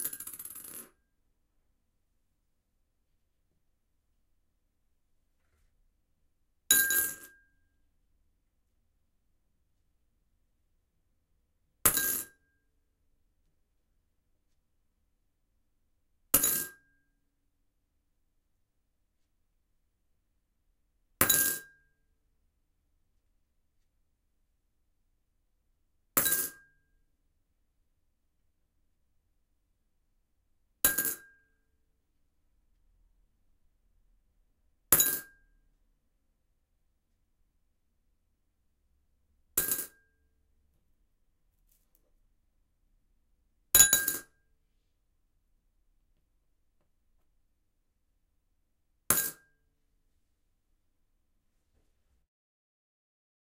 used a ZOOM H6 to record several coins, which i dropped in a ceramic bowl. Handy for toiletladies ;)